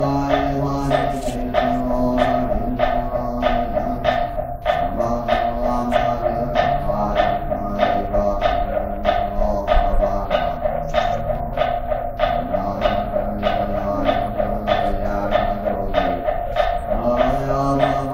Monk Chant1
Buddhist monk chanting at Bulguksa Temple, Gyeong-Ju, South Korea.
chant, temple, buddhist, field-recording, korea, loop